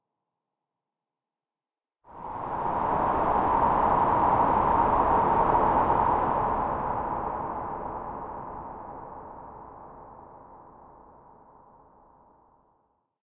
Manufactured Wind Effect. Pink noise with a Low Pass Filter and Reverb Effects
air, blow, generated, noise, wind